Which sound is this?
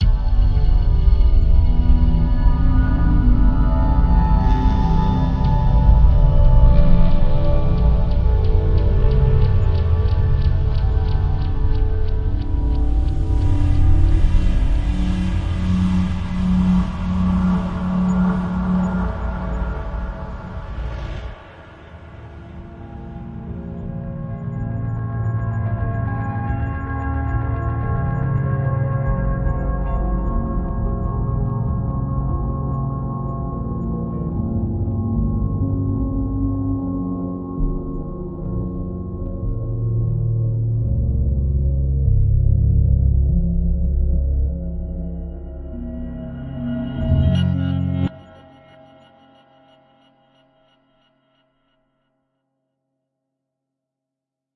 Sound is helped made through a recorded piano.